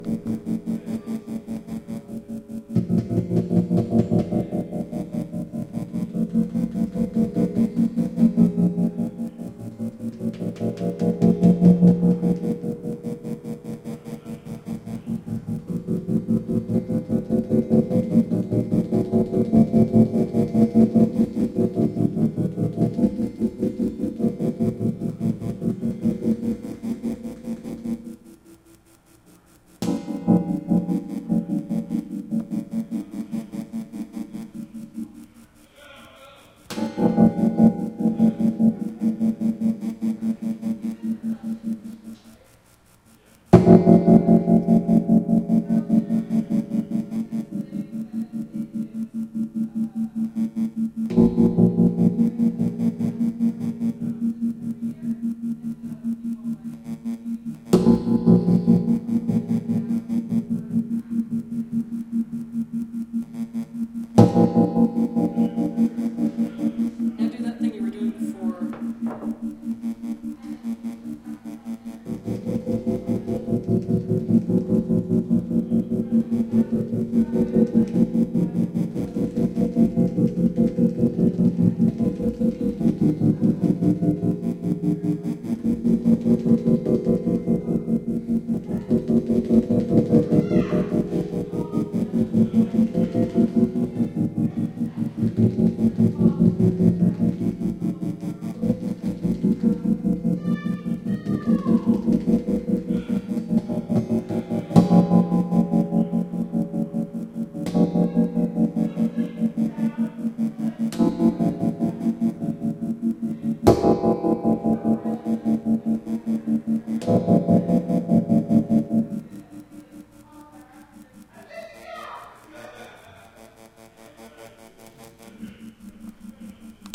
Spring in workshop
The sound is heavily processed, as it's being played through another student's system. You can also hear people in the workshop talking and laughing. Recorded April 24, 2013 using a Zoom H2.